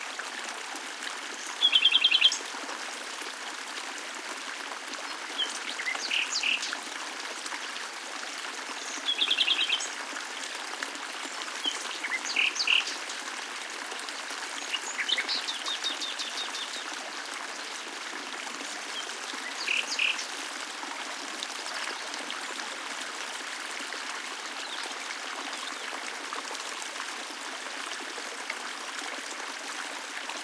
20070504.Sierra.stream.02
A stream in Sierra Morena (S Spain), bird calls (Nightingale) in background
field-recording, nature, stream, water